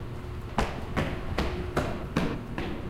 Footsteps in a racquet ball area. Microphone used was a zoom H4n portable recorder in stereo.
atmosphere, field, foot, recording